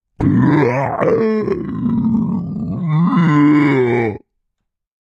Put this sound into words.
These are all me making terrible grunting growling snorting non-words for an offstage sea creature in a play but it could be anything monster like. Pitched down 4 semitones and compressed. One Creature is a tad crunchy/ overdriven. They sound particularly great through the WAVES doubler plugin..